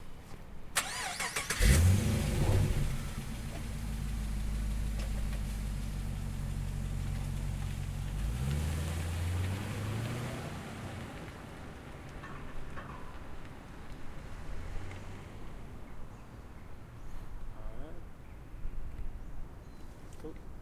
vehicle holdenssv ignition driveoff
holden ssv ute ignition,and drive off. recorded from rear
car, drive-off, holden, ignition, revving, ssv, ute, vehicle